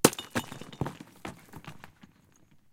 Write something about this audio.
rock thrown off steep rocky cliff near Iron lakes just south of yosemite.